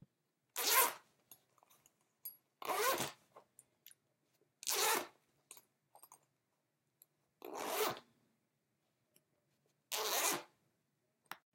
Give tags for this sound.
abrir
cerrar
cremallera
zip
zipper
zipping

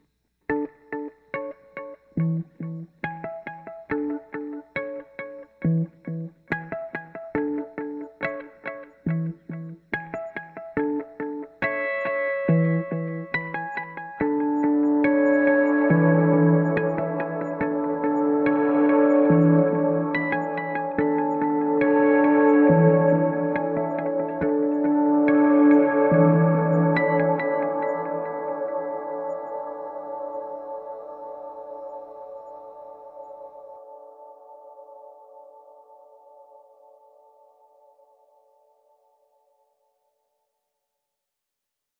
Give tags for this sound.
logo
music

